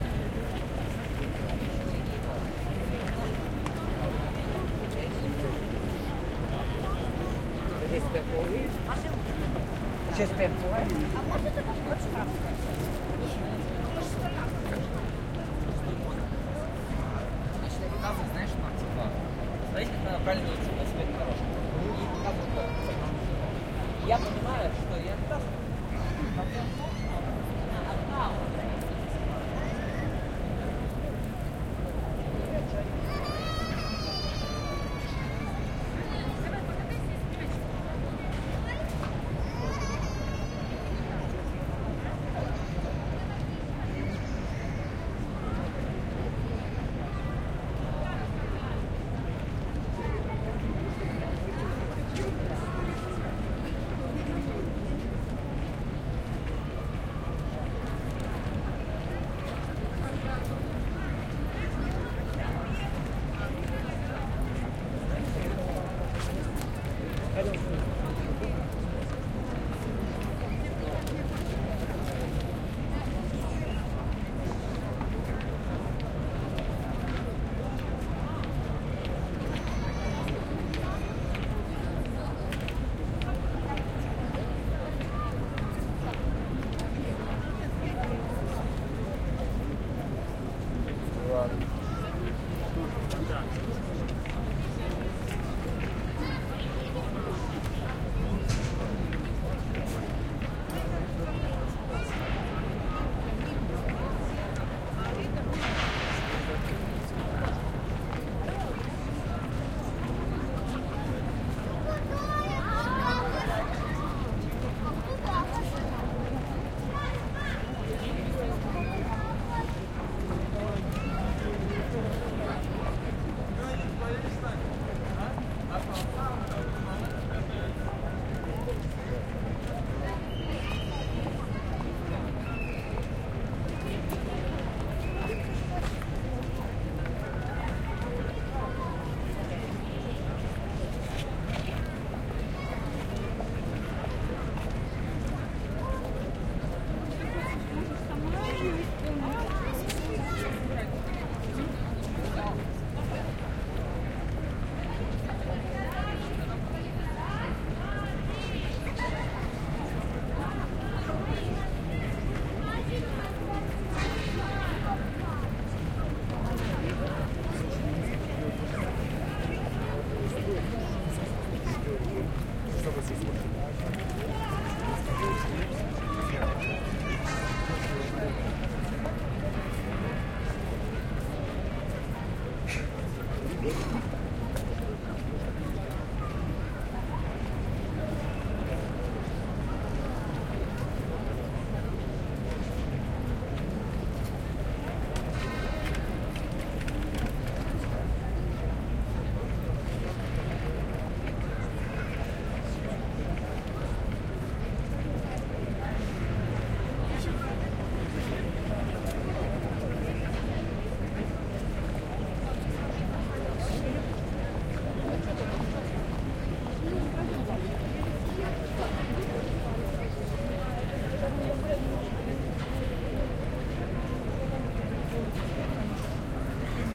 crowd - people walking along at the corner of Red Square near Historical museum, Moscow, summer 2014
crowd, field, Moscow, recording, Red, Square